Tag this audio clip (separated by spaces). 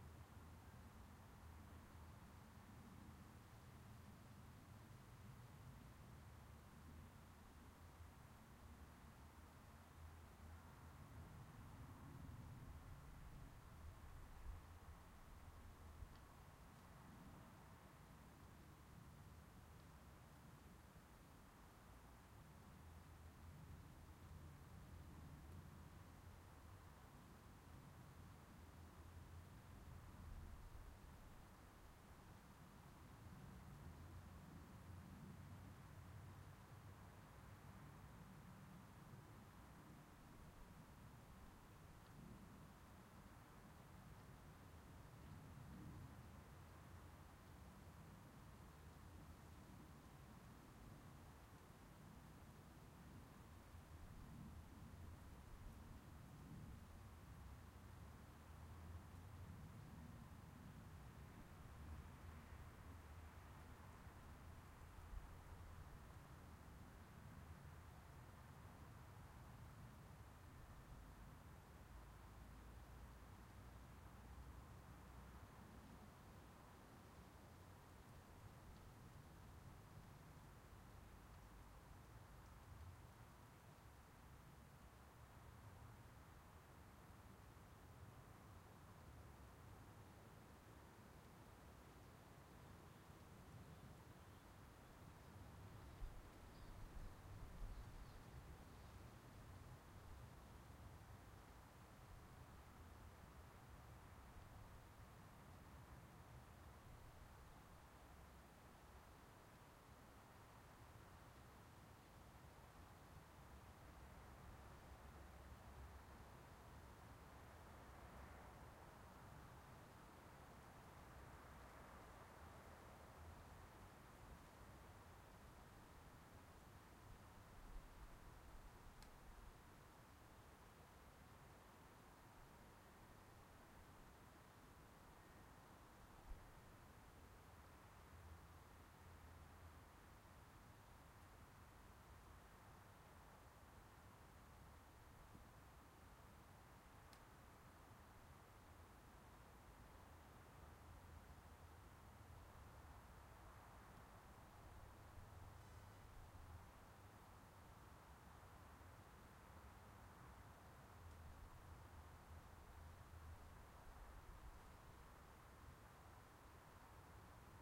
ambient nature forest